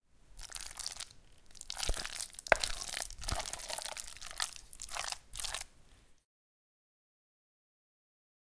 mixing potato salad